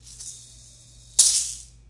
A Remo lemon-shaped percussion thrown to the air in front of a Rode Nt1-A microphone.